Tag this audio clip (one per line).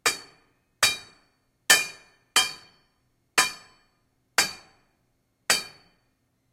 pipe
bang